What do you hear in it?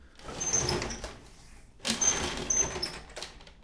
dish glass 1
This sound is part of the sound creation that has to be done in the subject Sound Creation Lab in Pompeu Fabra university. It consists on a person taking a dish and a glass.